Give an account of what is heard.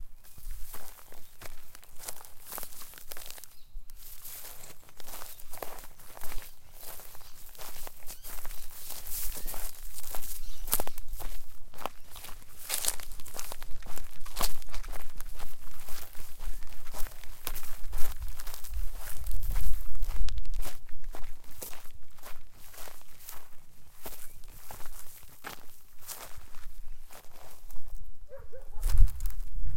Australian Bush Atmos 02 - Walking
Walking through a bush / forest.
Australia, Australian, birds, blowing, bush, chirping, dirt, flies, fly, footsteps, forest, grass, insect, insects, rocks, trees, valley, walking, wind